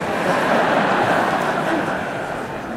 Laugh Crowd 2
Recorded with a black Sony IC voice recorder.
laughing, group, chuckle, auditorium, crowd, people, audience, laugh, large, concert-hall